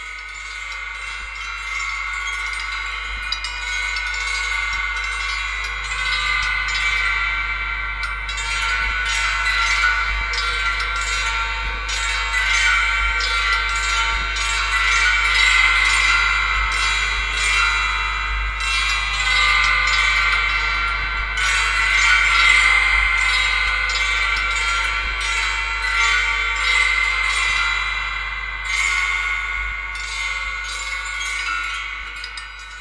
Crispy elf music heard at distance
elfs, fantasy, music, sci-fi